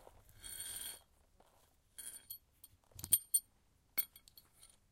Metal belt buckle draping on stone floor and clanging
Metalic beltbuckle is draped over a stone floor and then lifted to clang together slightly.
adpp, clang, beltbuckle, ringing, clanging, draping, clank, buckle, metal, metallic, belt